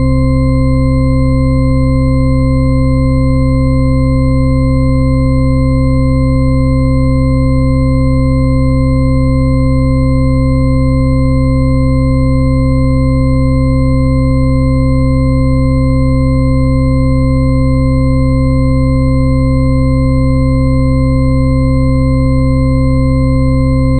From Wikipedia:
"A Shepard tone, named after Roger Shepard (born 1929), is a sound consisting of a superposition of sine waves separated by octaves. When played with the base pitch of the tone moving upward or downward, it is referred to as the Shepard scale. This creates the auditory illusion of a tone that continually ascends or descends in pitch, yet which ultimately seems to get no higher or lower."
These samples use individual "Shepard notes", allowing you to play scales and melodies that sound like they're always increasing or decreasing in pitch as long as you want. But the effect will only work if used with all the samples in the "Shepard Note Samples" pack.